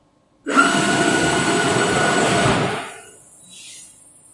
Laser Machine Move Away Fast 2
medium, Rev, Factory, Buzz, electric, Machinery, low, high, motor, machine, Mechanical, Industrial, engine